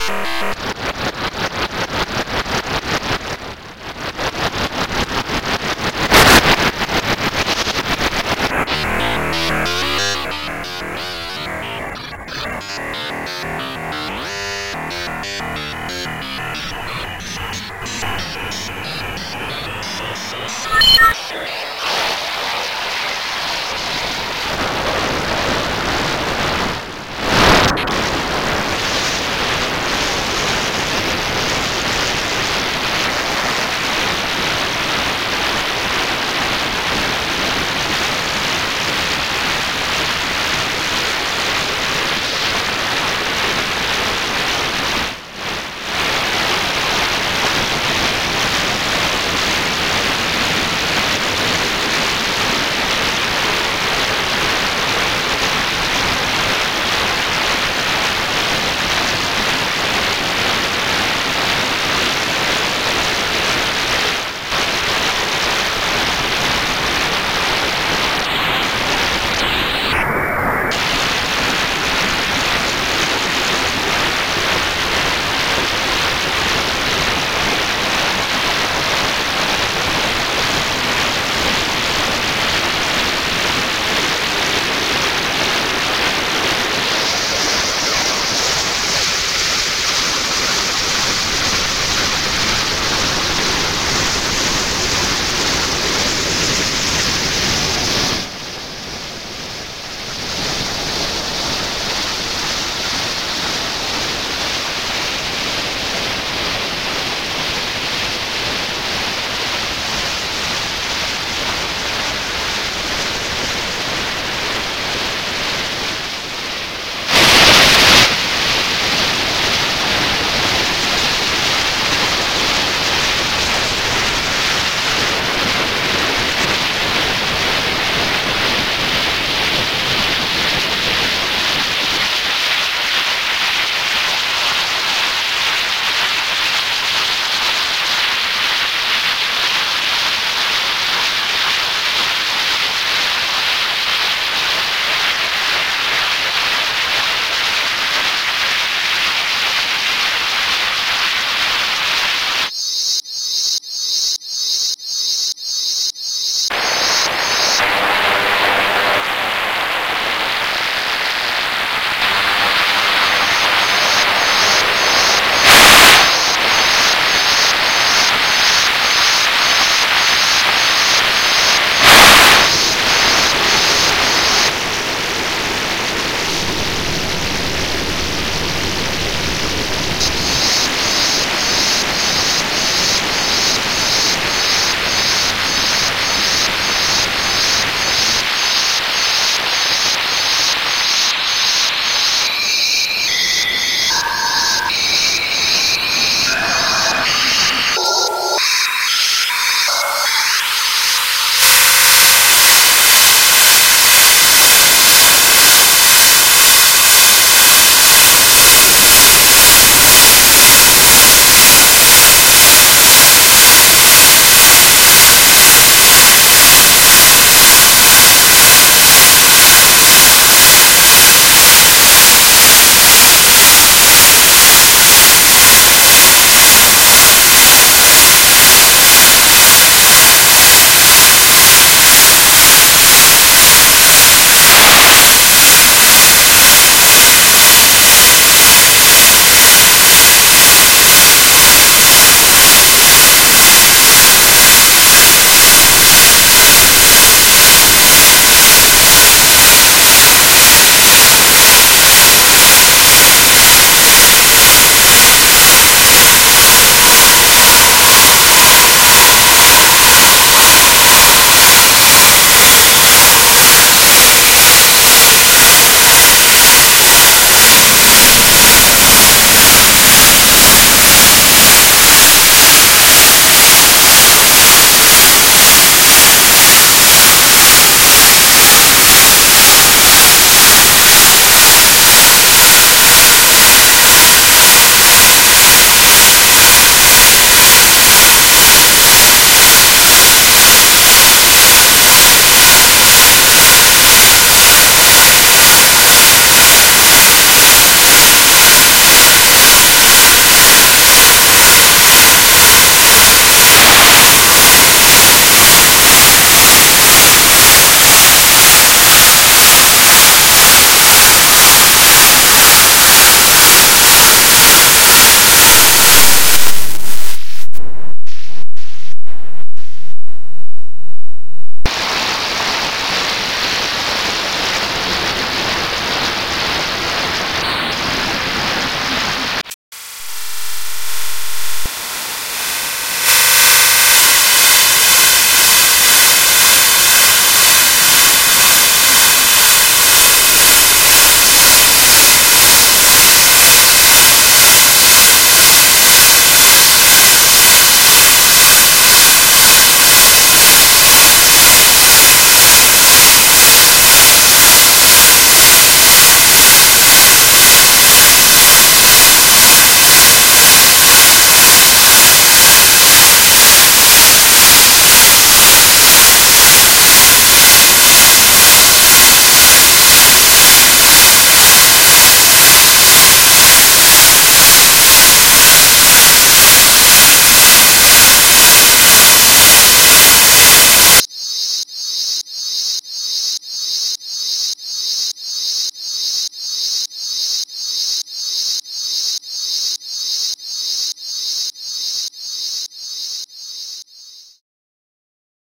Modular Noise Bits Raw File
This is the raw noise file from which the other samples were cut from. It was made in Glitchmachines Quadrant, a virtual modular plugin.
experimental, sound-design, noise